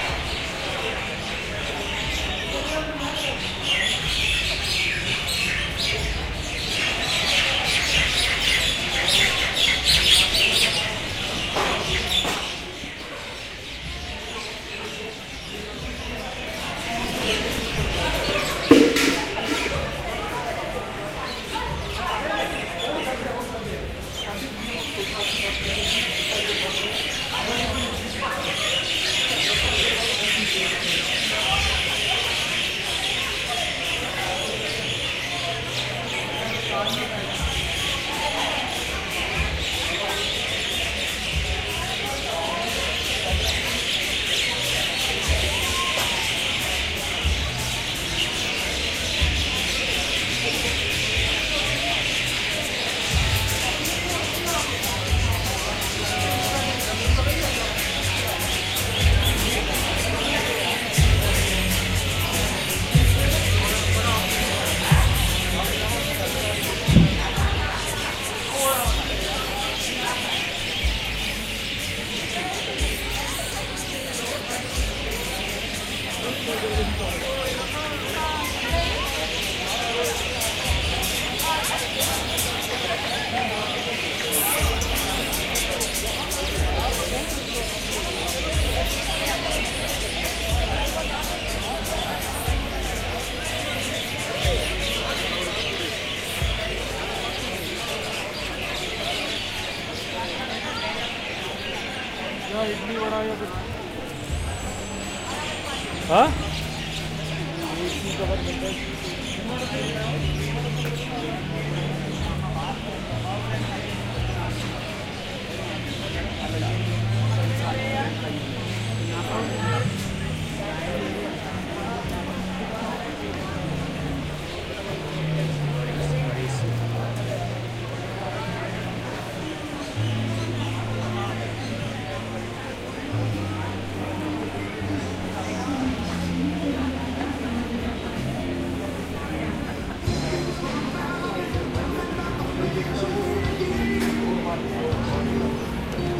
STE-008 Chandigarh evening
The square in Chandigarh's Sector 17 on a December evening, with noisy night birds and human chatter.